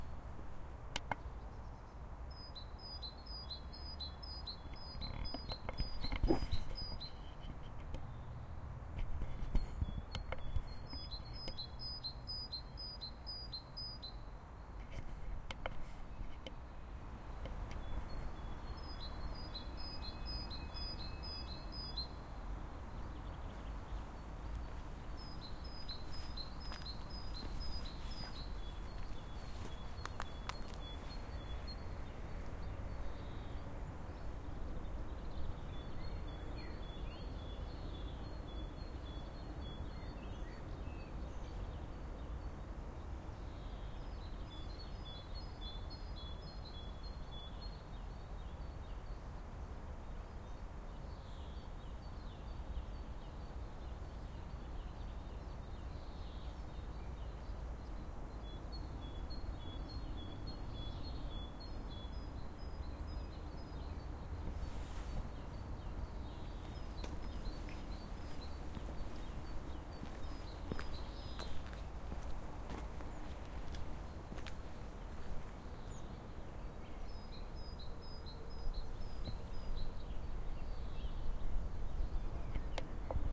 Spring birds singing. Park ambience with distant traffic.
ambience, birds, park, park-ambience, spring, spring-ambience, springbirds